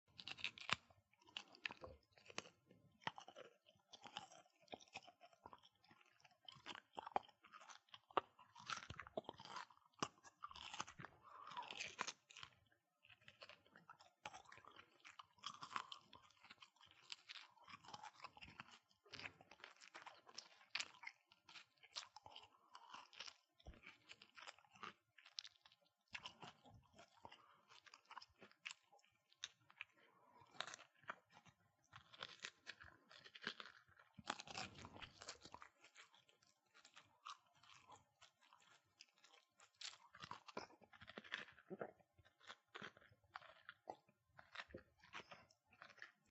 me, eating carrots
me, eating some carrots
there's not much to it XD
carrots, chewing, crunch, crunching, crunchy, eating, snack